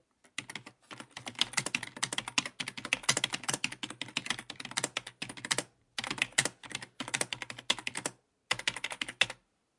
Typing on the keyboard.